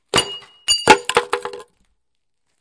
Me throwing a piece of wood down onto a concrete patio covered in broken glass.
broken, glass